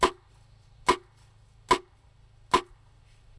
Hitting a mid-size tree trunk with a foot-long, 2" diameter portion of a tree branch. Unprocessed.
field-recording, hit